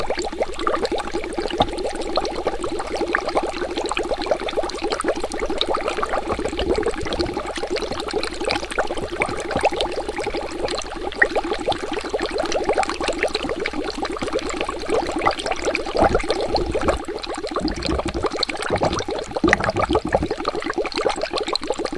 Bubbles being blown through a straw into a glass of water. Works well as a looping sound effect.